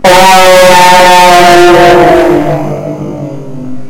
This sound almost sound's like a monster screaming and screeching quietly almost and sound's like it's echoing as well.